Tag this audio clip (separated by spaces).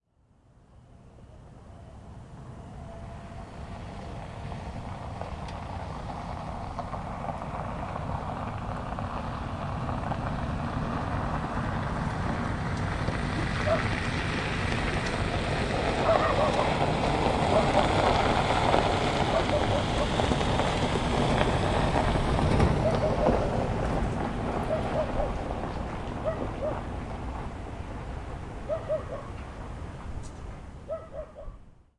ambiance ambience ambient atmo atmosphere background background-sound dog field-recording general-noise noise road soundscape stone track white-noise